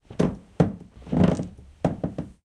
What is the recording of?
A floorboard creaking recorded with an NT5 on to mini disc